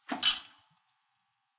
weights dropped
gym, weights, drop, machine